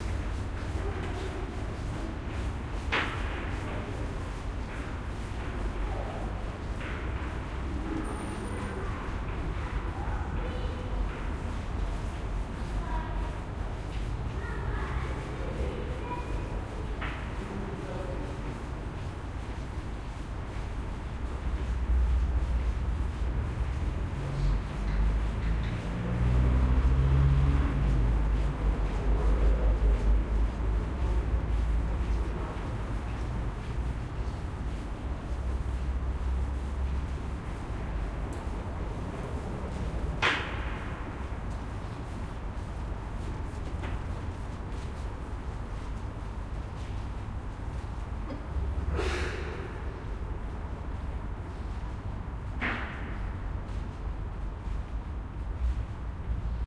Road underpass. Heavy traffic. Reverberant footsteps and voices.
Recorded with pair of DPA4060 and SD MixPre-D in pseudo-binaural array